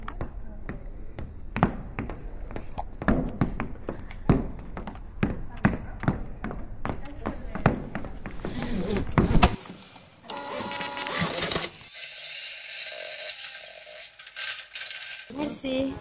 Caçadors de sons - Merci
A workshop in which we are introduced to some tools and methodologies of Sound art from the practice of field recording. The sounds have been recorded with portable recorders, some of them using special microphones such as contact and electromagnetic; the soundtrack has been edited in Audacity.
Cacadors-de-sons, Fundacio-Joan-Miro